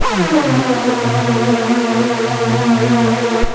Hoover Sound C5
My remake of the iconic hoover sound commonly heard in hard house. A lot of reverb in this one. Made using the Sawer soft-synth in FL Studio 10
The key is C5